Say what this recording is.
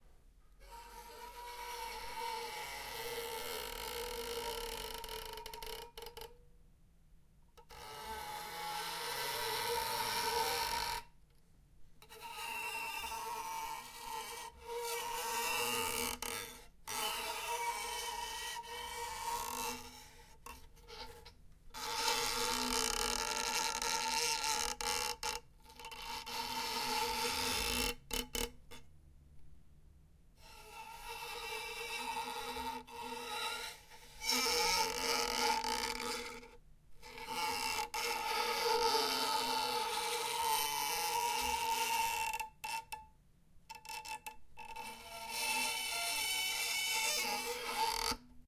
Cello bow + muted ukulele 1
A poor little ukulele being abused by a cello bow. The strings were muted with some paper in order to achieve lots of unpleasant sounds.